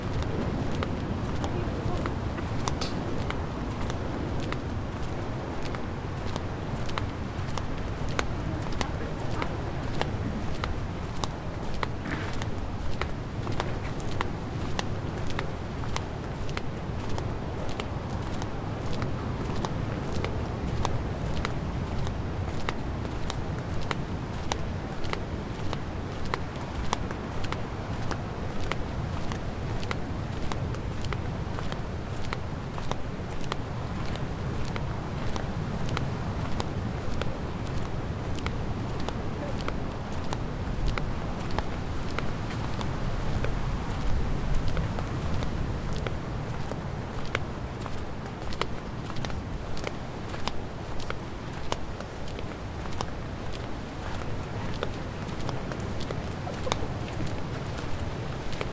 Walking through some medium deep sand along the shoreline. Waves and Water.
beach, flip-flops, ocean, sand, seaside, walking